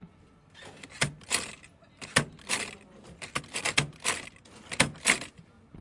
20141118 openingdoor H2nextXY
Sound Description: Öffnen einer Tür - Opening a door
Recording Device: Zoom H2next with xy-capsule
Location: Universität zu Köln, Humanwissenschaftliche Fakultät, Gebäude 213, Eingang
Lat: 50°56'5"
Lon: 6°55'14"
Date Recorded: 18.11.2014
Recorded by: Jonas Ring and edited by Vitalina Reisenhauer
2014/2015) Intermedia, Bachelor of Arts, University of Cologne
Building, Field-Recording, University